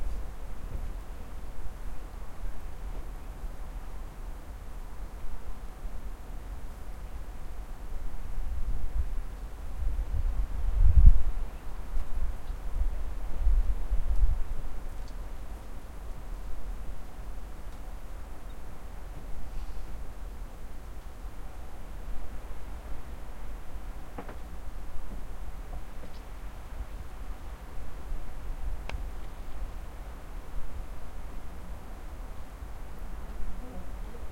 miljö + handljud 1
Some ambience from the mountains. Recorded with Zoom H4.
ambience, mountains